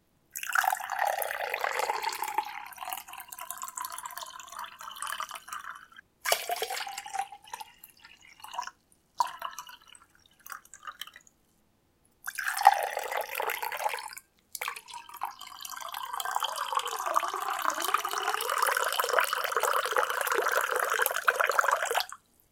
pouring water into glass
Poured water into a cup for your needs. Recorded with a ZOOM H2N.
liquid, pour, pouring, water